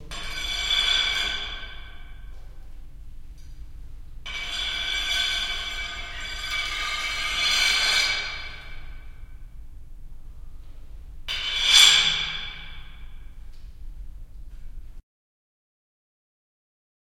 Metal Pipe Scraped on Concrete in Basement
Dragging a metallic pipe on a concrete floor in a big room. Recorded in stereo with Zoom H4 and Rode NT4.
basement, concrete, iron, large, metal, metallic, pipe, room, tube